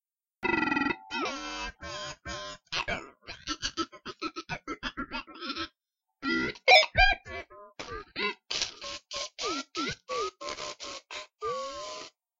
Robot Robby 1
Robot sound effects.
alien, computer, machine, mechanical, robby, robot, scary, sci-fi, scifi, space, weird, wierd